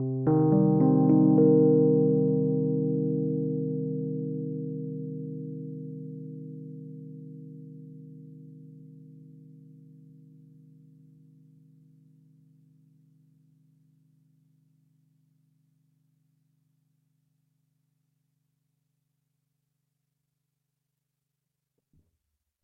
rhodes mystery bed 3
Arpeggio chord played on a 1977 Rhodes MK1 recorded direct into Focusrite interface. Has a bit of a 1970's mystery vibe to it.
vintage, electric-piano, suspense, rhodes, electroacoustic, chord, keyboard, mysterious